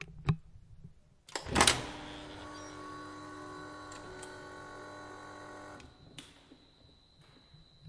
20141119 automaticdoor H2nextXY
Sound Description: pushing the door button, door opens automatically
Recording Device: Zoom H2next with xy-capsule
Location: Universität zu Köln, Humanwissenschaftliche Fakultät, building 906, groundfloor
Lat: 50.934734
Lon: 6.920539
Recorded by: Lia Wang and edited by: Carina Bäcker
This recording was created during the seminar "Gestaltung auditiver Medien" (WS 2014/2015) Intermedia, Bachelor of Arts, University of Cologne.
field-recording, university, cologne, button, door